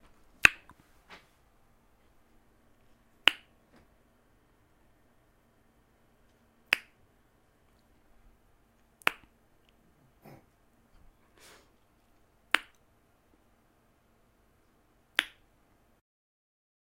Multiple variations of a tongue click